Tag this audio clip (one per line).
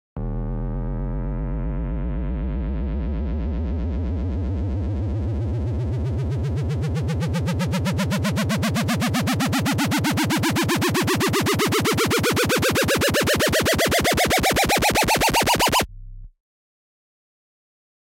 ascending
harmonious
house
layer
low
melodious
oscillating
pitch
popular
sound
sweep
techno
trance
up
uplifting